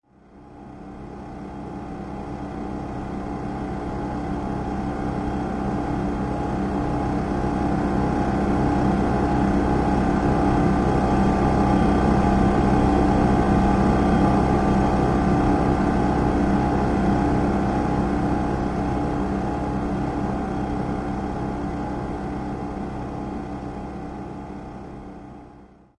Unprocessed slowed down recording of a refrigerator.